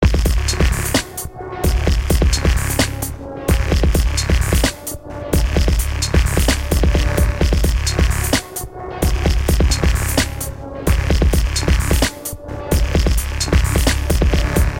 now beat synth 7
These sounds are from a new pack ive started of tracks i've worked on in 2015.
From dubstep to electro swing, full sounds or just synths and beats alone.
Have fun,
Bass, beat, Dance, Dj-Xin, Drum, EDM, Electro-funk, House, loop, Minimal, Sample, swing, Synth, Trippy, Xin